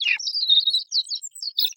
Jokes On You! Thats not a real bird. it was in fact my mouth that made the noise. well technically I made a weird noice into the mic, then I only kept the high pitched sounds and with a little bit of editing. so yeah, it is my mouth but not the raw sound. I'm not that good.
birds, chick